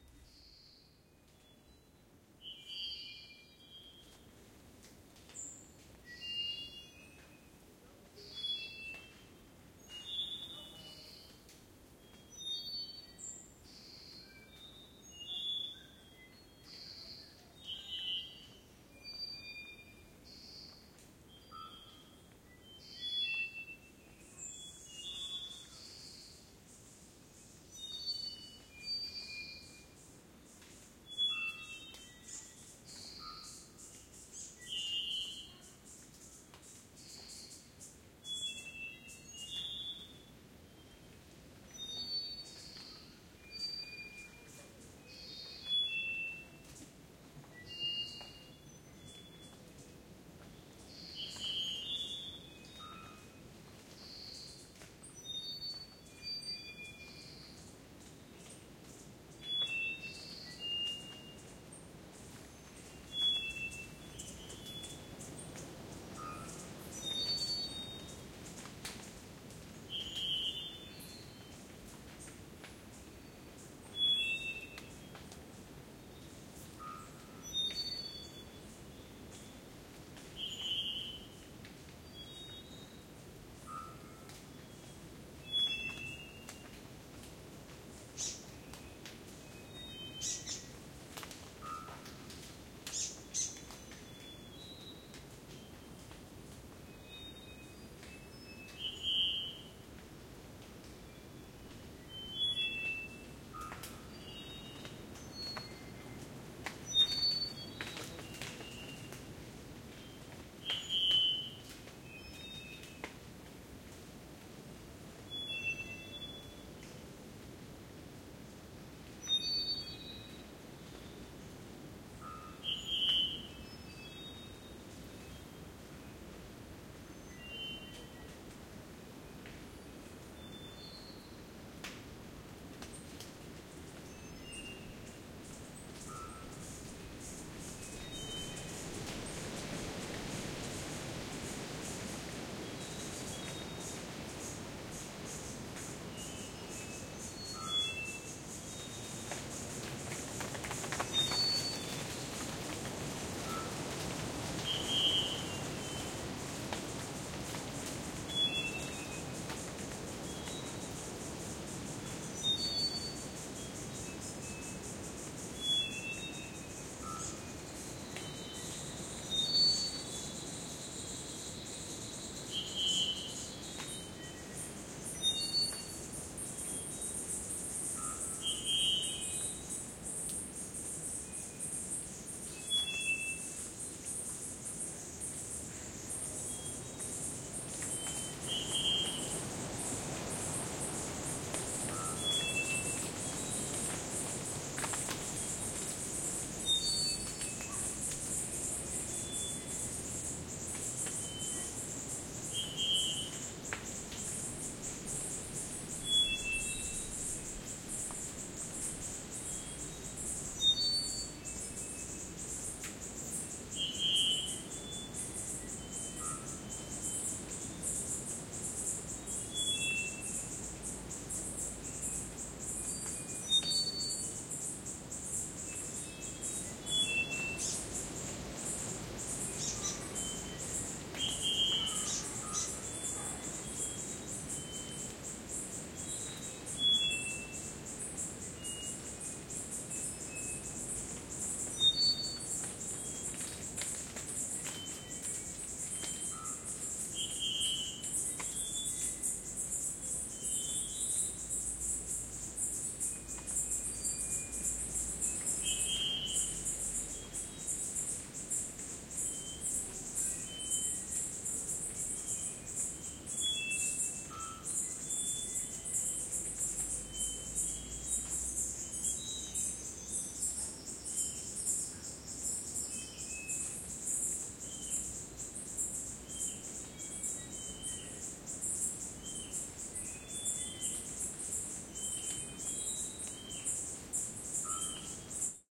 cr cloud forest 01
An ambient field recording in the Monteverde Cloud Forest Reserve. Lots of birds and rain and general cloud forest sounds. Recorded with a pair of AT4021 mics into a modified Marantz PMD661 and edited with Reason.
nature, birds, ambient, field-recording, animals, forest, costa-rica, outside, birdsong, wind, tropical